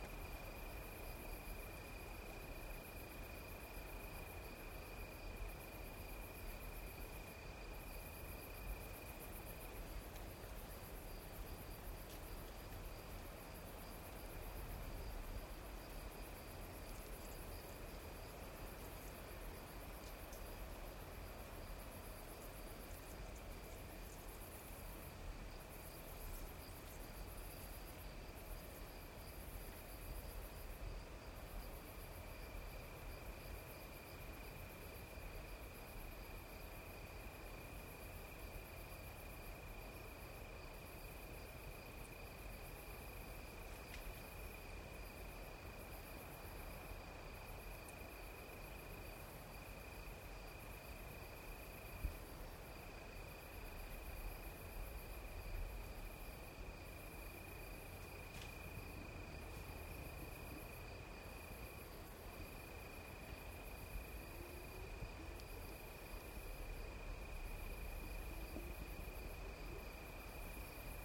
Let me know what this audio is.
Edge of forest on a windy night. Some rustling leaves, insects and crickets.
Rode M3 > Marantz PMD661